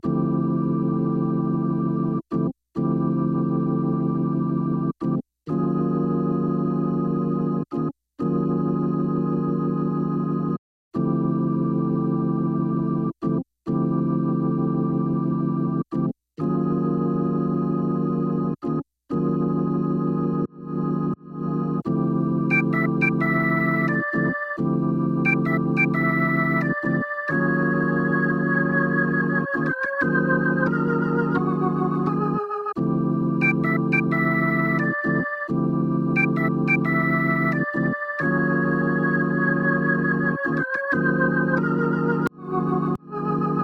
Lofi Organ Loop 88 BPM
88, beats, bpm, chill, lo-fi, lofi, loop, loops, melody, music, Organ, pack, sample, samples